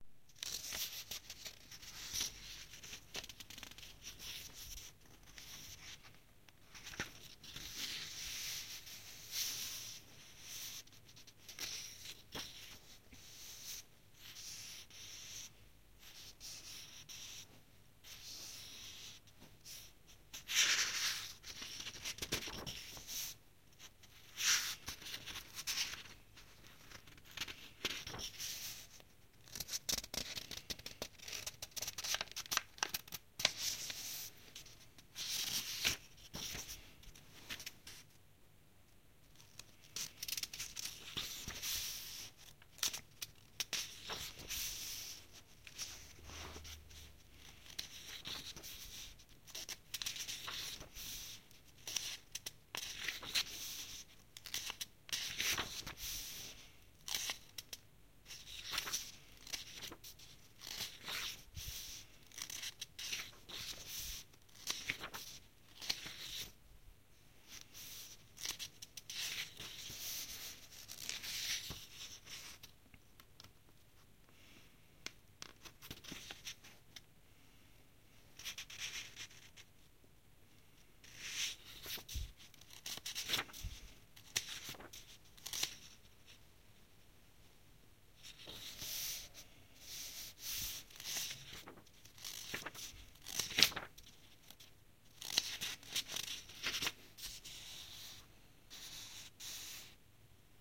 tracing paper
Tracing words on a book with fingers